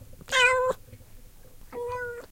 my cat meowing
meow, animal, meowing, kitty, pets, cat, cats, feline